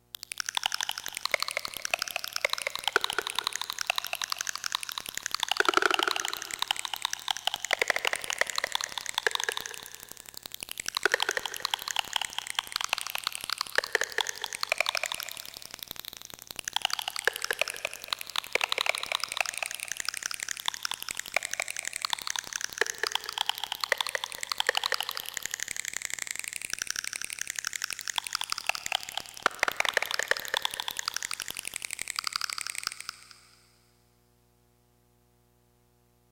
SCI-FI 5 (alien bug noise)
Noise Pattern that sounds like alien mouth or wierd bugs made by Korg electribe recorded on audacity.
noise, Sound-design, Machine, science, alien, lab, Synthetic, Space, Factory, Sci-Fi, Strange